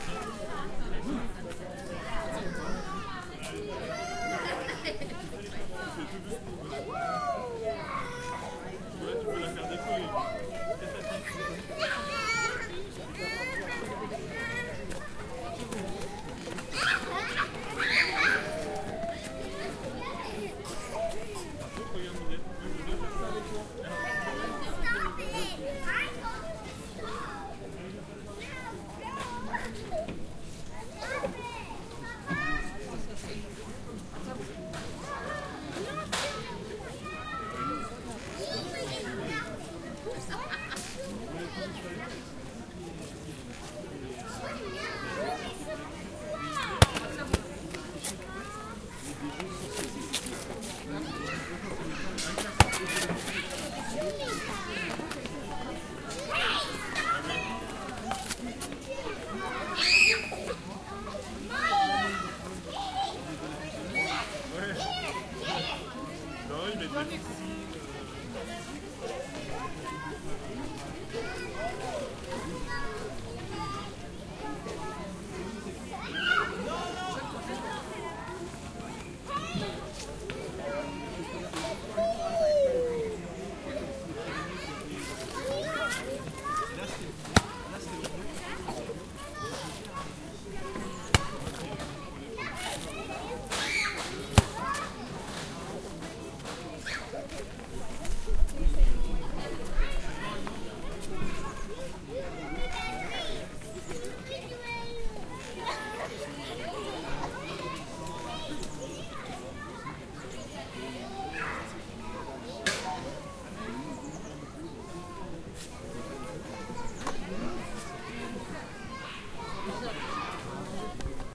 Childre in a square (french)
Children playing recorded in a square under trees. Enfants jouant dans un parc. The voices of chilren are mixed with the accompanying adults. Some voices may be clearly heard in french.